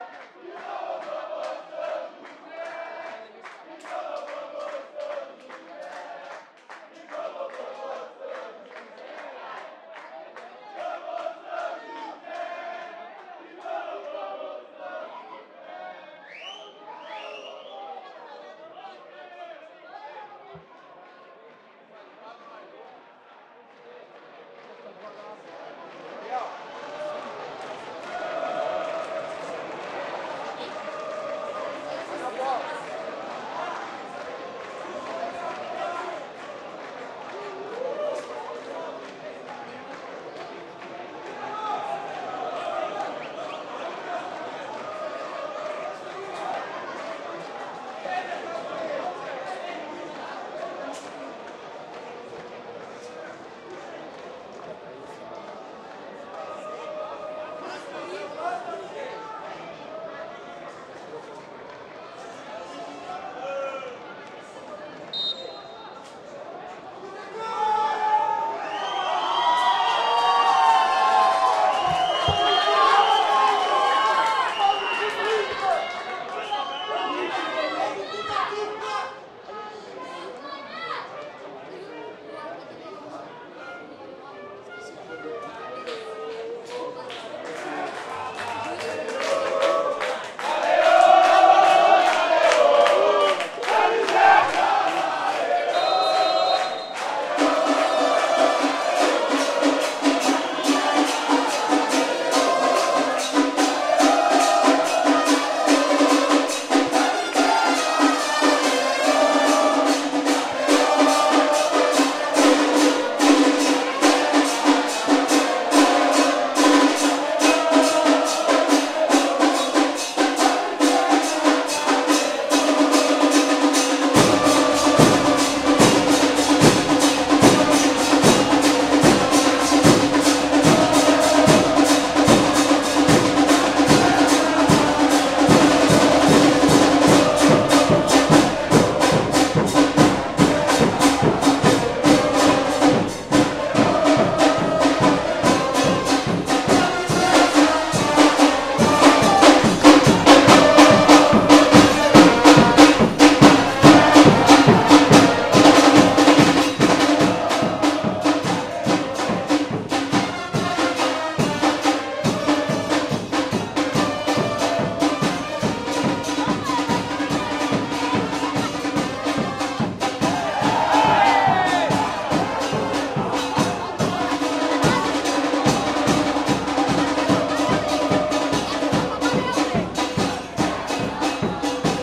TRATADA190127 0790 organizada ja ganhou
Radio Talk - Stadium - Recording - Soccer - Ambience
Ambience, Ambient, Drum, Recording, Soccer, Talk